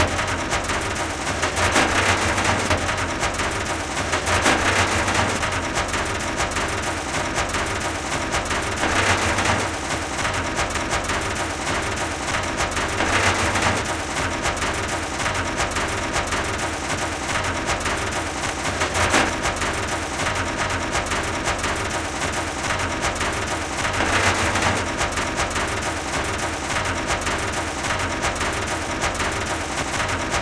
A stereo foley of an anchor chain being dropped on a steel ship. Rode NT4 > FEL batterry pre-amp > Zoom H2 line in
dropping, metal, anchor, metallic, stereo, chain, anchor-chain, rattle, foley